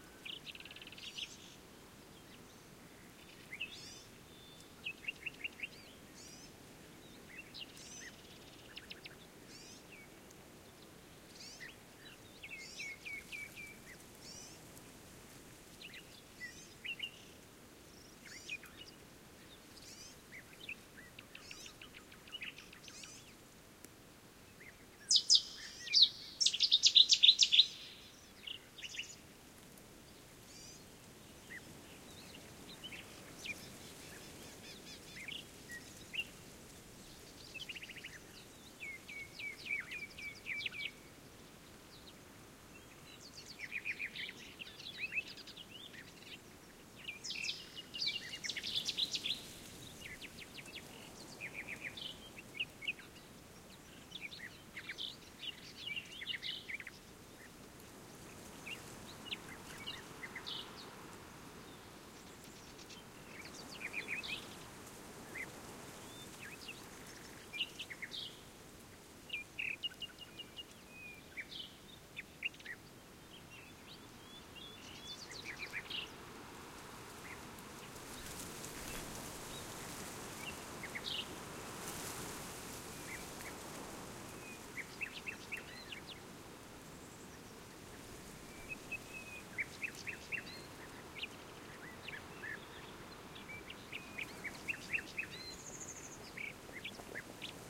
Nightingales and other birds sing in a ravine near Carboneras (Huelva, Spain). Near the end you can hear wind gusts on poplars. Shure WL183 into Fel preamp, Edirol R09 recorder